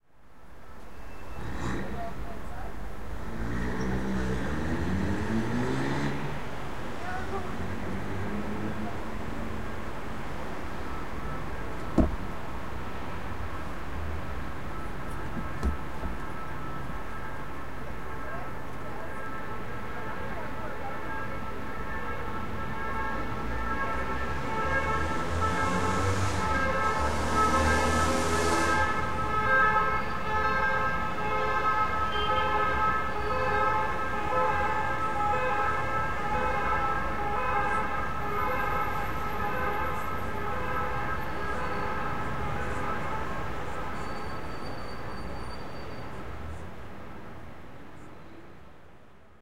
Field-Recording.BCN.Street
Barcelona street, with this “RARE” siren/horn along accelerating car, braking buses.
MD-MZR50, Mic ECM907
car, voice, street, accelerating, ecm907, field-recording, mzr50, sound